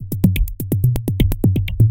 Rhythmmakerloop 125 bpm-20
This is a pure electro drumloop at 125 bpm
and 1 measure 4/4 long. Yet another variation of the 16 loop. Similar
to number 19, but with some electronic toms added. It is part of the
"Rhythmmaker pack 125 bpm" sample pack and was created using the Rhythmmaker ensemble within Native Instruments Reaktor. Mastering (EQ, Stereo Enhancer, Multi-Band expand/compress/limit, dither, fades at start and/or end) done within Wavelab.